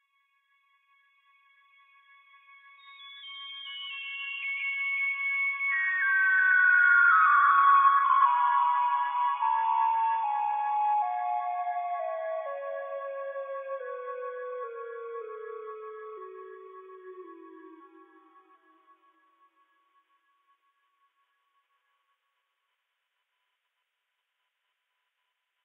dying pixel
One note synth pad with changing harmonic resonance